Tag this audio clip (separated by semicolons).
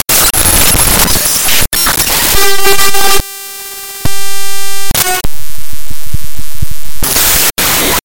glitch; lo-fi; loud; noise